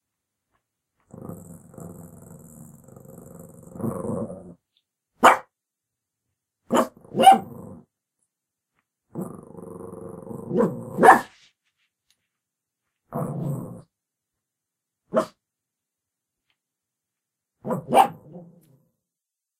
Pug Woof 3
Our pug growling and barking.
barking, dog